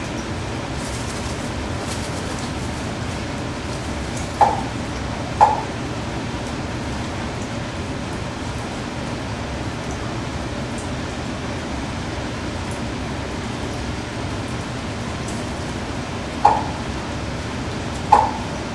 Popping sounds and wingflaps from an unknown bird in a zoo. Recorded with a Zoom H2.
pop, aviary, bird, zoo, flapping, field-recording, wings